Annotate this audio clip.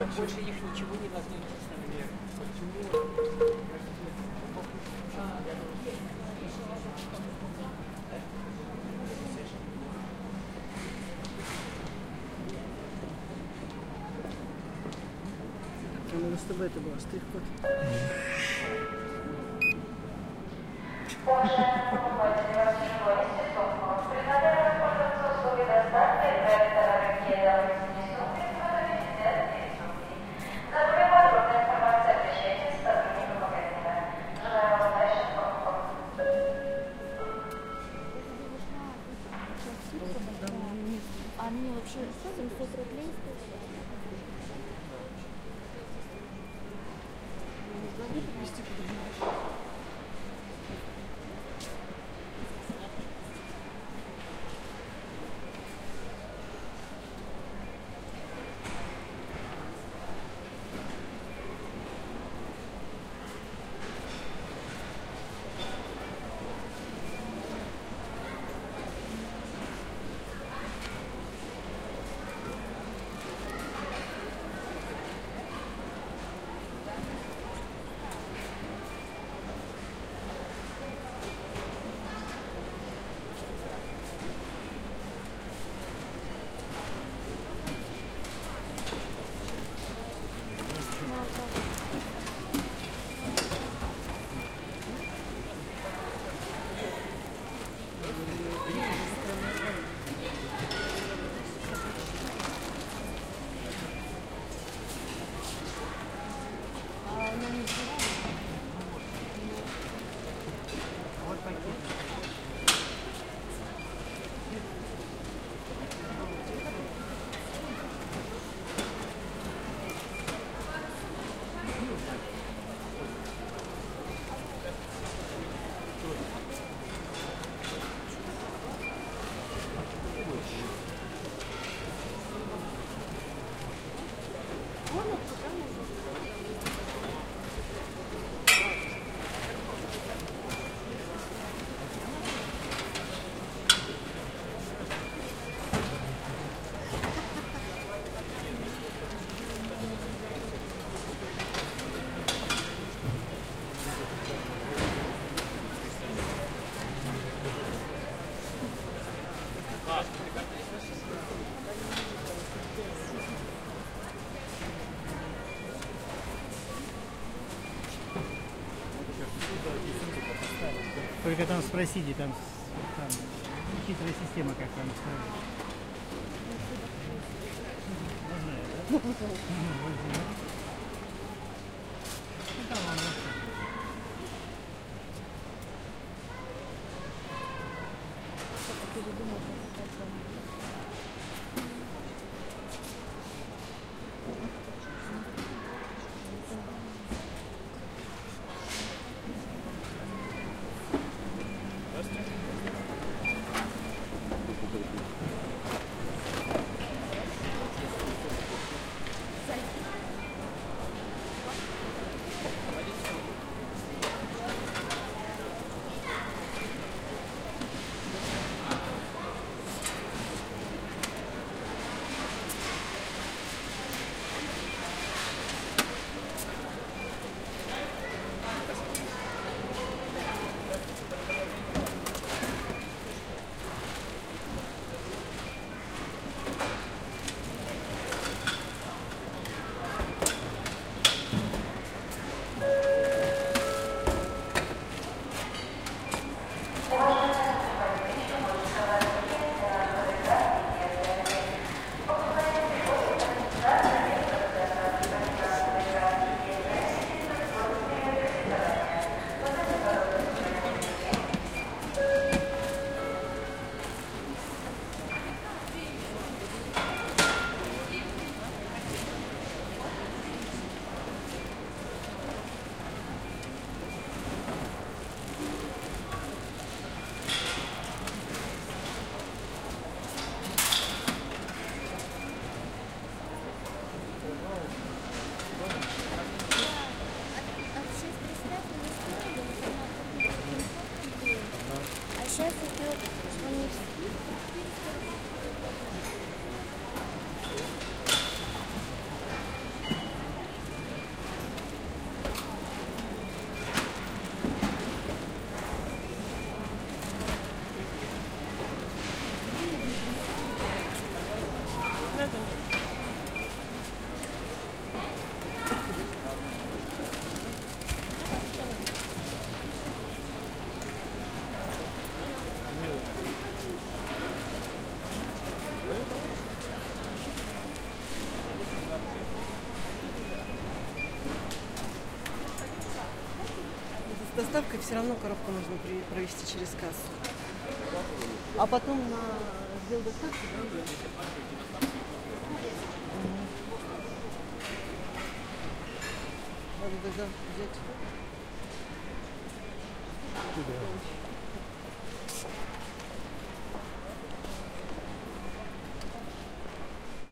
Atmosphere in the shop (Ikea) in the Omsk. Walking through the shop. Peoples talks.
-05:30 Audio announcing in Russian language about buying.
Recorder: Tascam DR-40.